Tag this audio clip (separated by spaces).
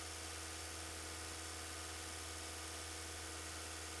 car dyno engine mercedes vehicle vroom